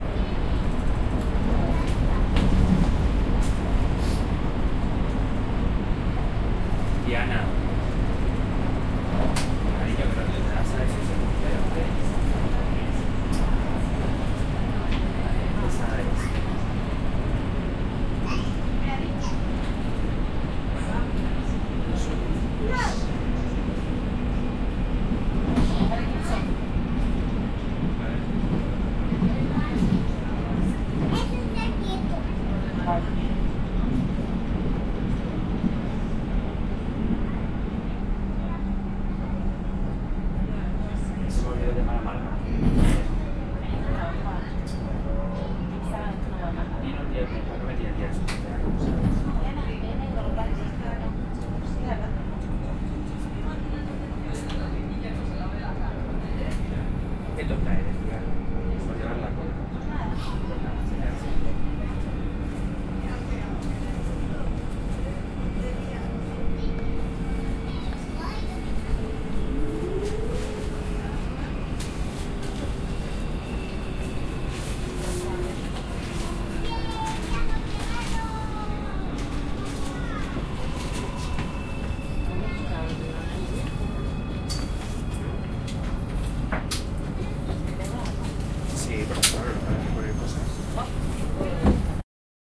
Tren Int. Train Gente Hablando Español
Espaol, Gente, Hablando, Int, Train, Tren